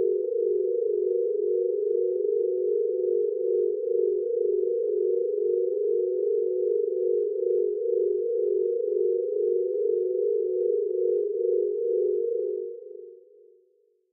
horror ambience high
Interesting sound that I made accidentally and reminded me to the noise that I've heard in the movie ''the ring''